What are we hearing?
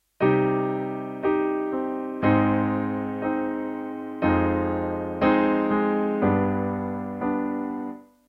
piano loop in c-minor
piano-accomplisment with left hand, to replace bass or use as intro.